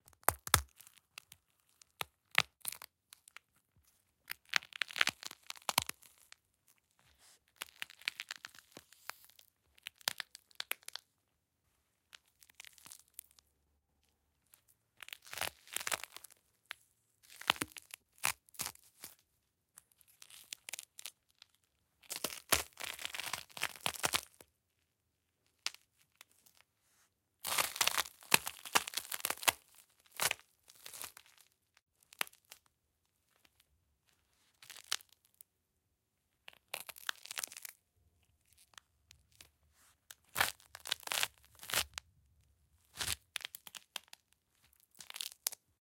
snow ice crackle gore break bone
Recording some cracking ice i stepped on.